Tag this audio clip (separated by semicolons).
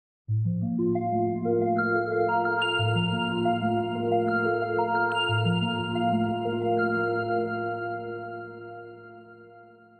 Sequence; Synth; Doepfer-Dark-Time; Analog